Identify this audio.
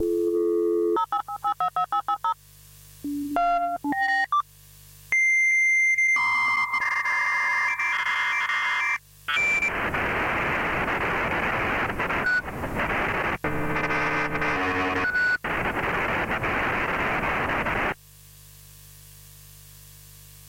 Fake dial-up modem sound (Tape recorded)
This is a **fake** dial-up modem sound. Rendered in SunVox.
communications, tape, cable, modem, telephony, telephone, tone, dtmf, dialing, signal, busy, vintage, phone, recording, dial